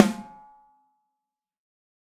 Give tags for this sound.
beyer drums

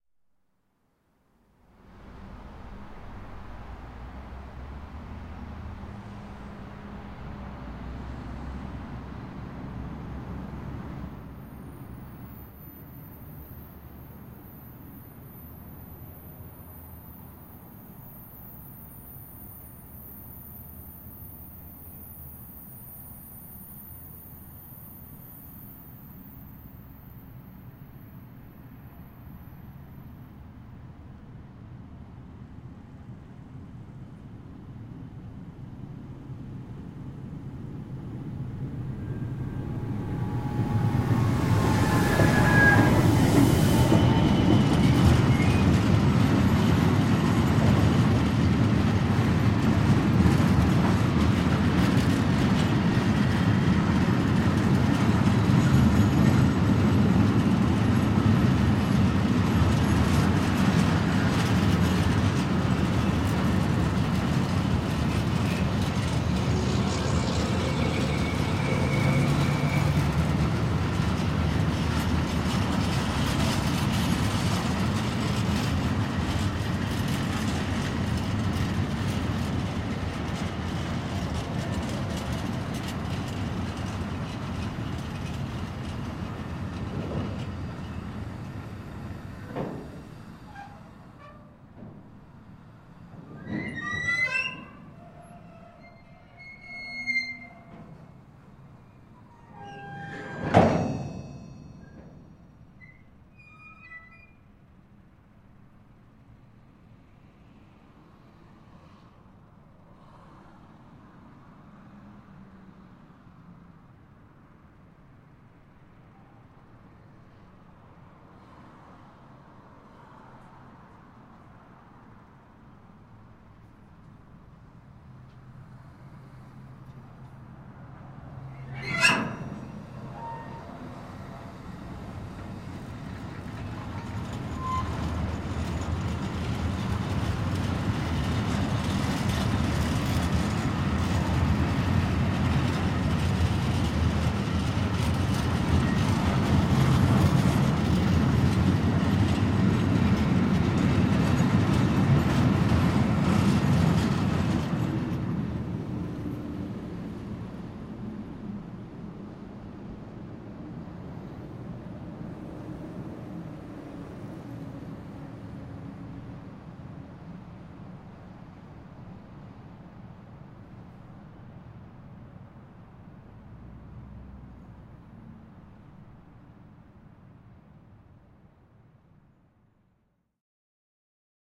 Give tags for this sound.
squeak transport Train clunk metal

